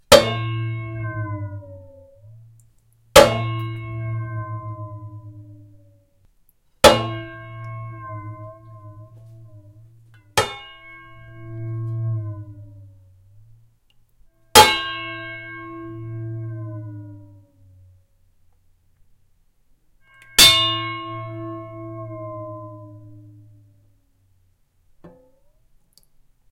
Bell Water Doppler
Hit a pot and put it in water, tone is shifting
ping, swipe, bong, bell-set, water, impact, bell-tone, tone, shift, hit, dong, bell, ding, ring, doppler